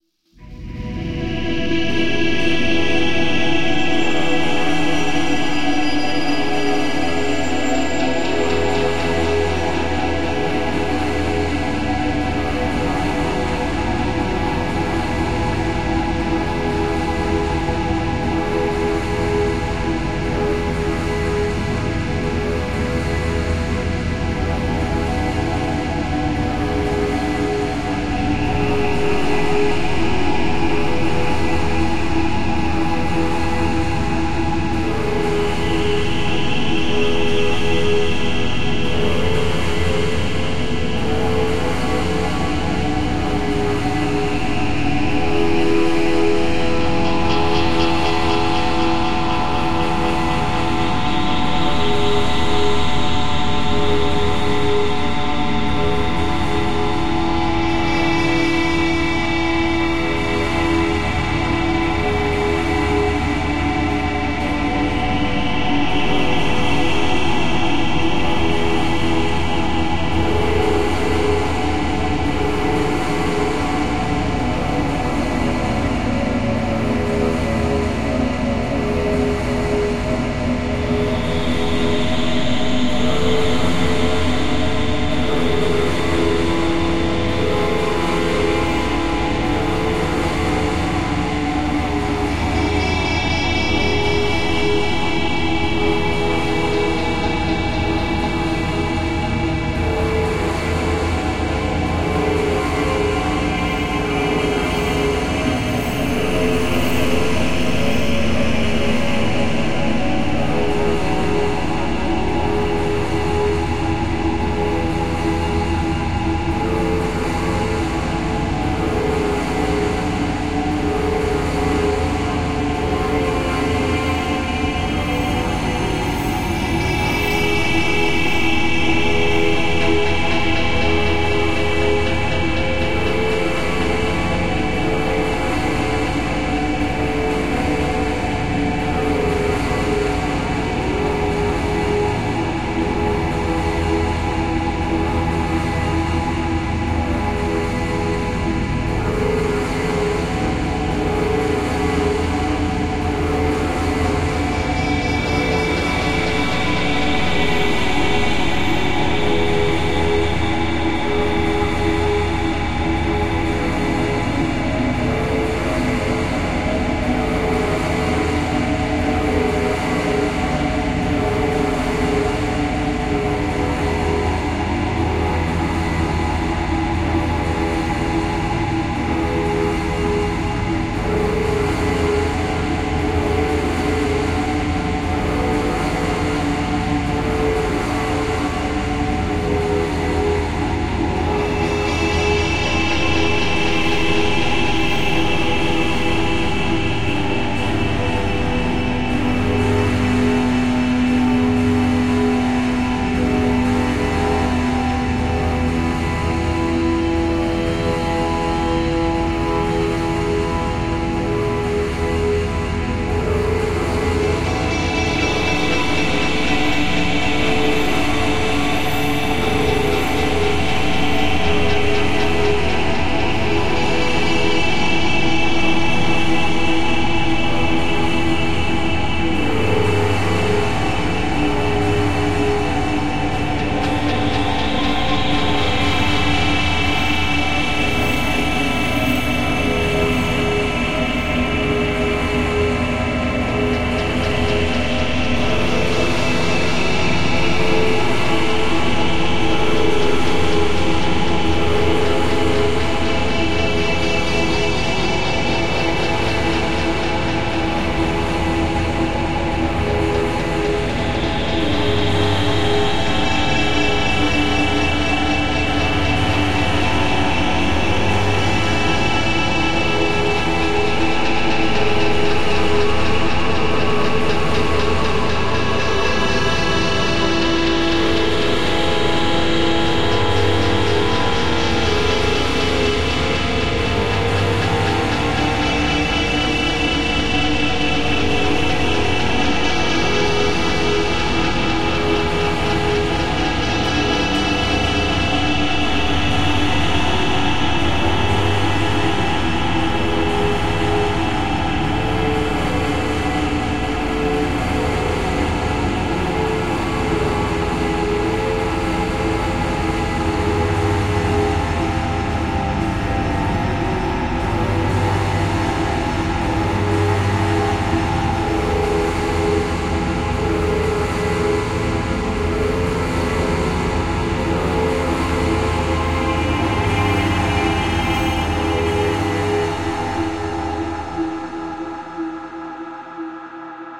Tomb Echo Experiment by Lisa Hammer
This is meant to be a great soundscape for a horror film or post-apocalypse scene. The listener is meant to be put in a state of dread, like they are walking into Dracula's tomb. I like to meditate to it.
suspense, frightful, drone, dramatic, haunted, ominous, soundscape, deep, imminent, shady, terrifying, Gothic, sinister, spectre, spooky, anxious, soundtrack, tomb, phantasm, horror, scary, macabre, thrill, dark, creepy, fear, drama, phantom, terror, film